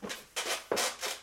sitting down on a wood chair which squeak